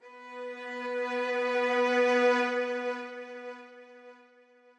Violin B+1 Oct
These sounds are samples taken from our 'Music Based on Final Fantasy' album which will be released on 25th April 2017.
b, Music-Based-on-Final-Fantasy, string